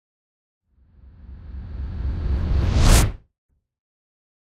Cinematic Woosh SFX-013
Cinematic Woosh effect,is perfect for cinematic uses,video games.
Effects recorded from the field.
Recording gear-Zoom h6 and Microphone - RØDE NTG5
REAPER DAW - audio processing
stinger, movement, implosion, sound, metal, sub, reveal, game, explosion, impact, riser, video, cinematic, swoosh, sweep, epic, logo, effect, hit, whoosh, thud, deep